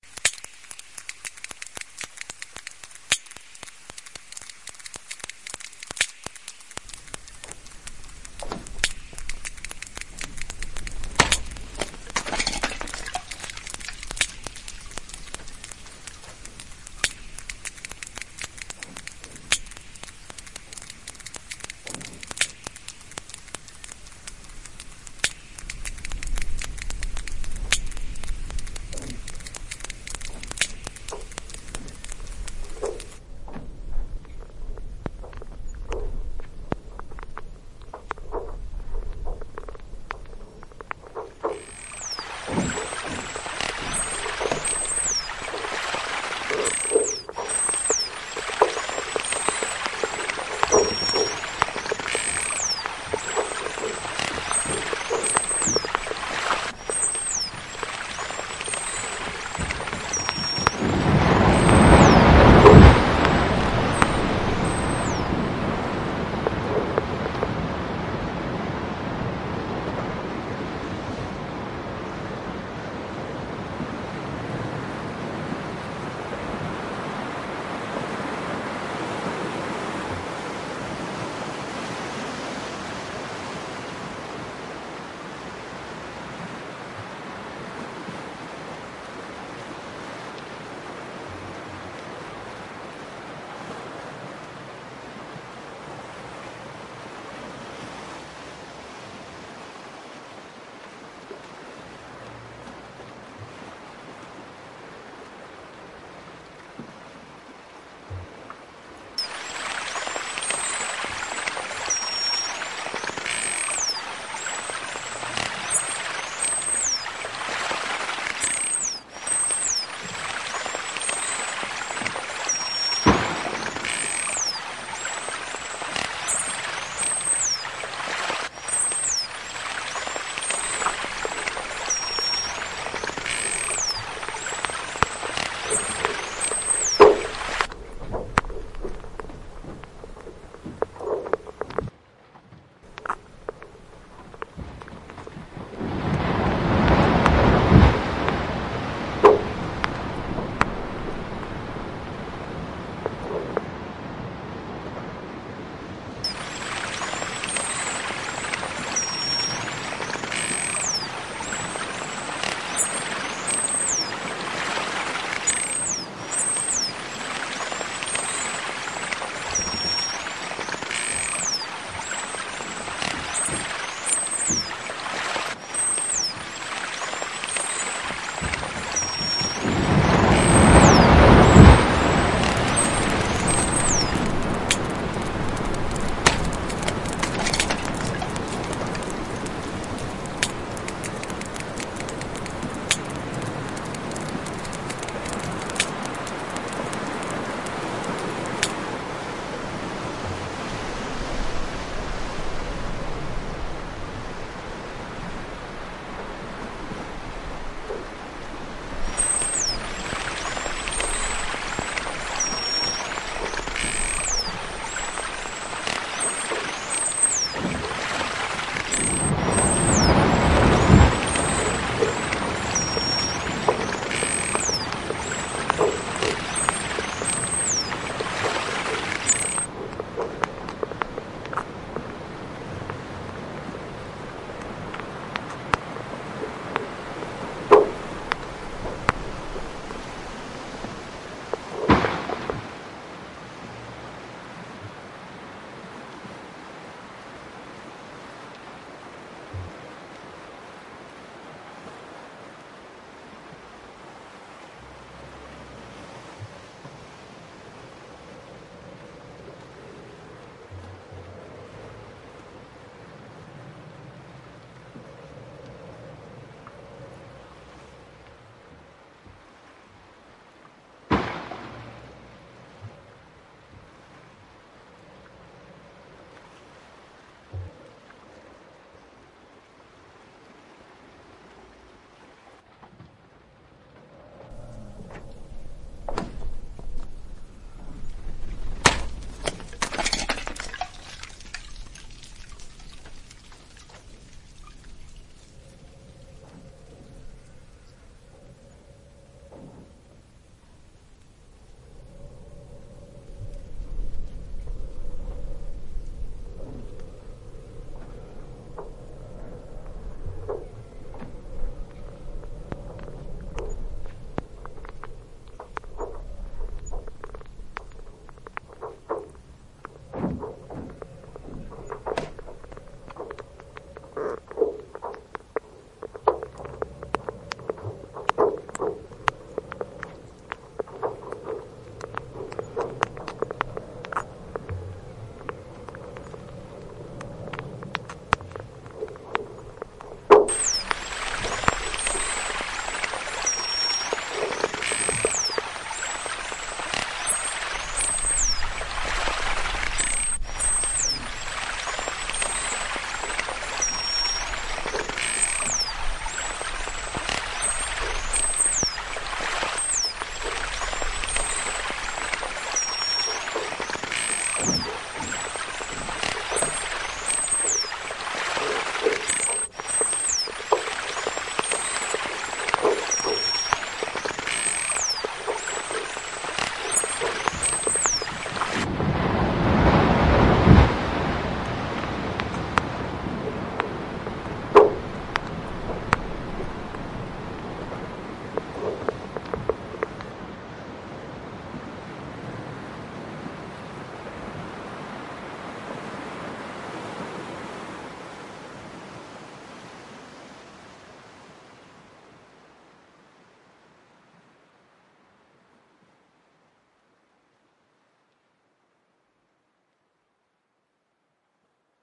Fairy ice whales
Alaska, Glacier Bay, ice bergs melting, crashing, tinkling, with a whale breach